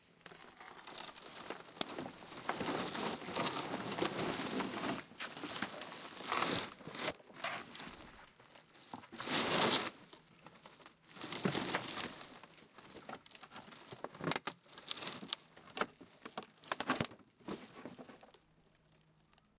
Wood panel board debris pull scrape
Part of a series of sounds. I'm breaking up a rotten old piece of fencing in my back garden and thought I'd share the resulting sounds with the world!
debris, panel, pull